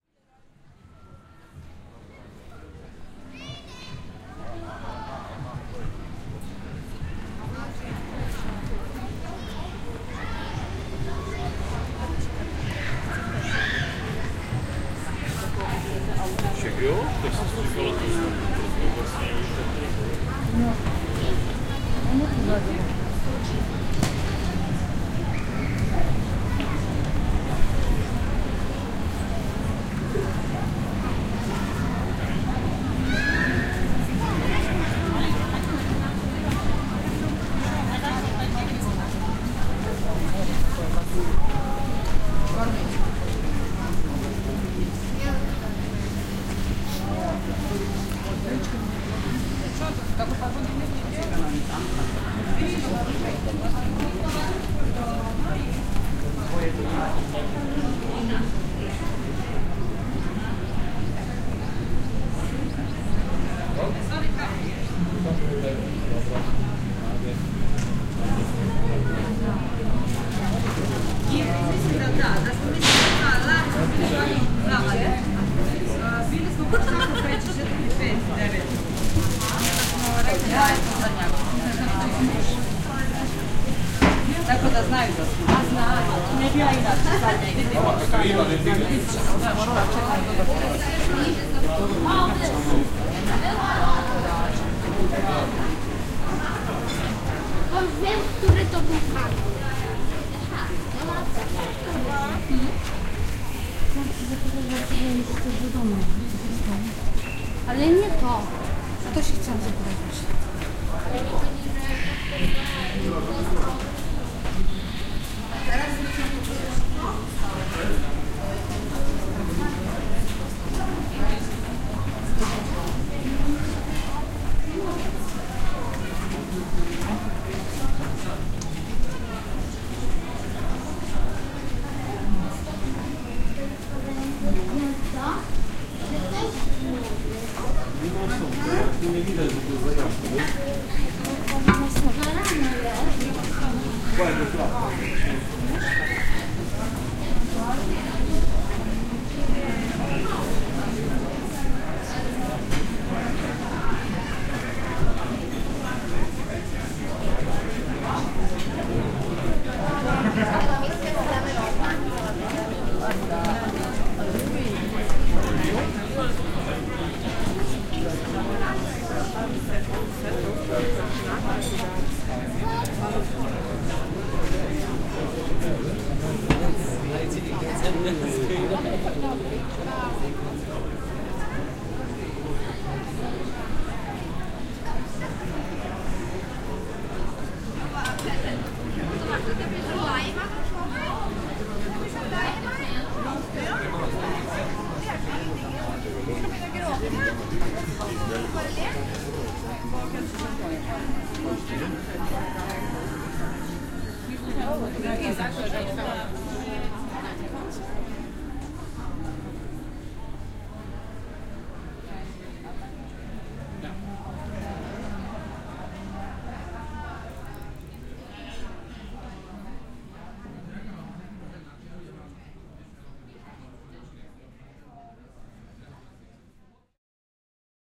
Makarska City Life
Spontaneous recording of City Life in Makarska, Croatia [2017-07-04].
Soundscape is very noisy, chaotic with many people voices, and if you are concentrated enough, you can notice the multiculti atmosphere.
I advice to use the headphones.
city, field-recording, fun, night, people, street